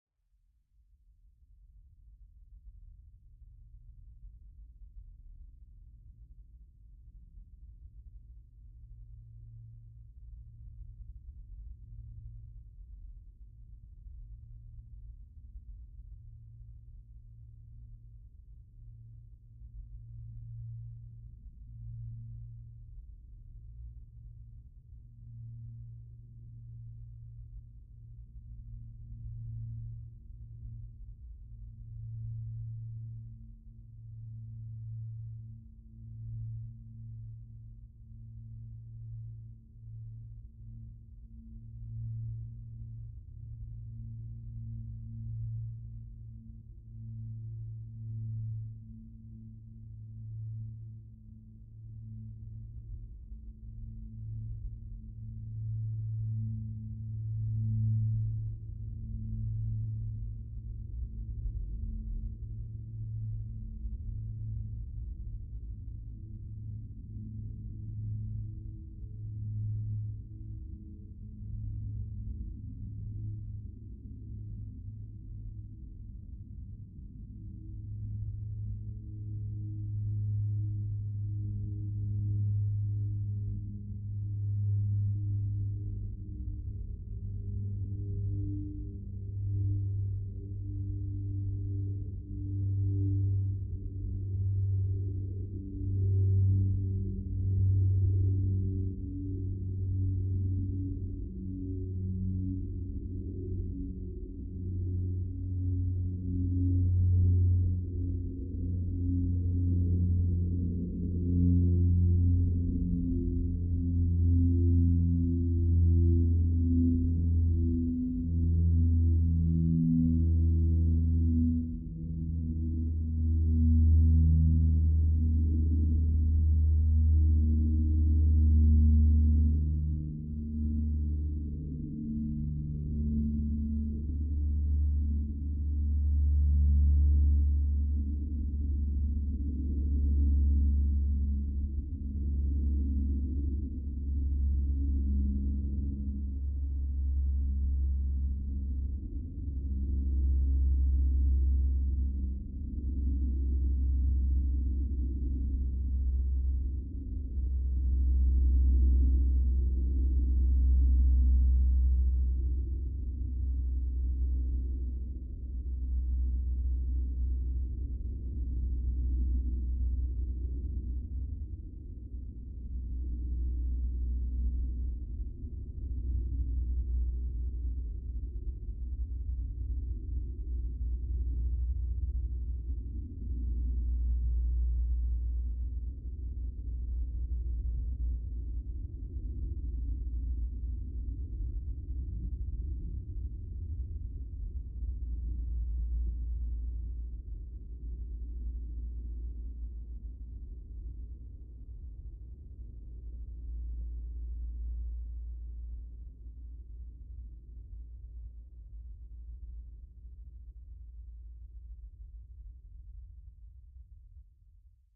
Peaceful Air Plane
This is a field recording of an air plane, slightly denoised from birds sounds. Stereo field is also processed.
Recorded in Moscow region, Russia with DPA 4060, Telinga dish, Sound Devices MixPre-D and Sony PCM D100